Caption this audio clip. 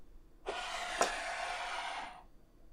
glass slide 05
sliding a glass across a table